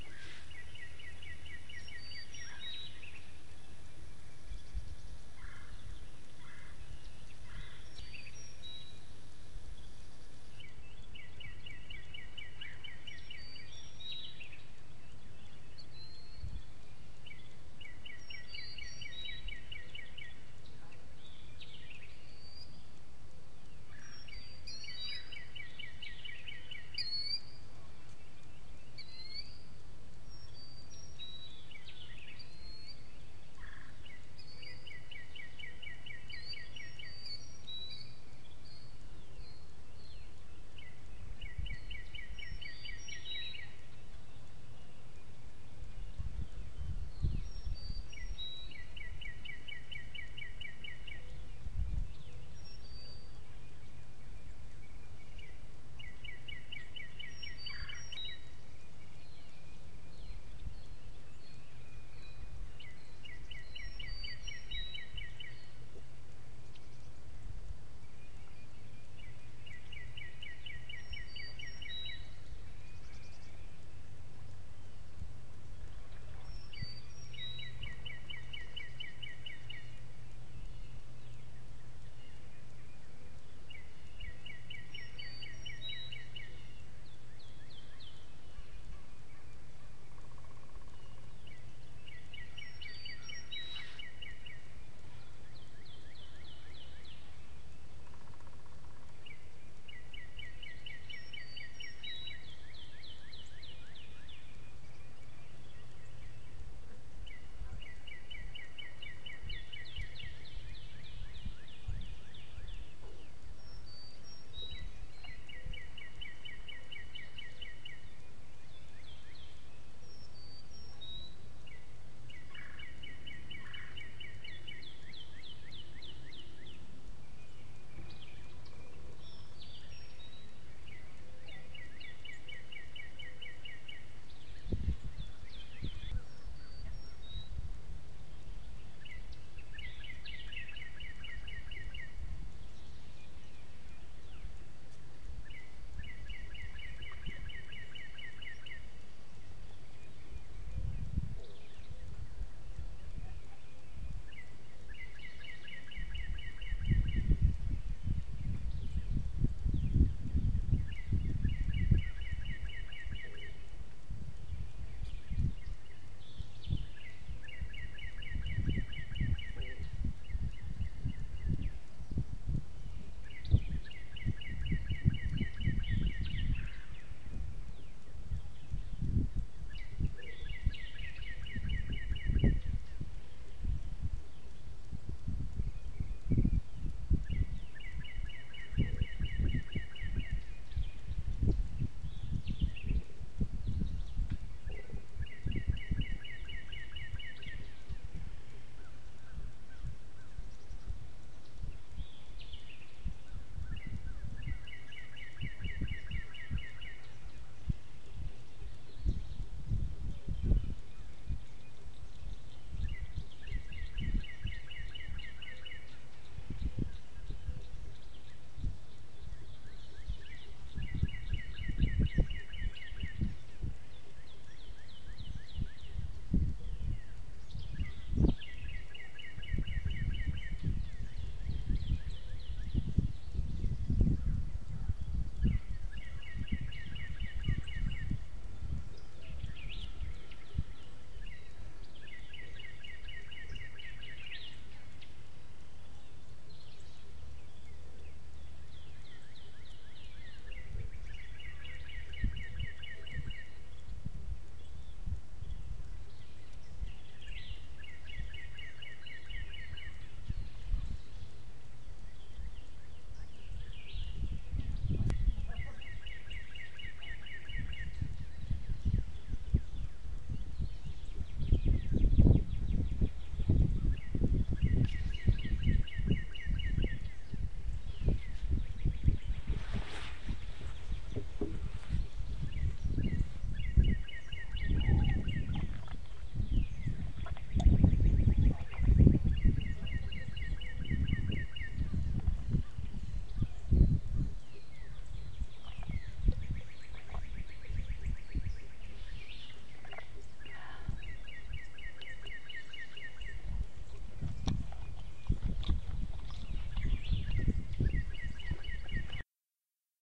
morning at pond edit
Another Recording of the Pond near Navasota TX Mellow morning at the pond... birds and more peaceful